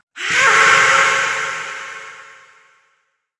Spooky, fearful scream with echo. I was using NCH Software Wavepad (free).
scary, fear, fearful, haunted, spooky, halloween, eerie, creepy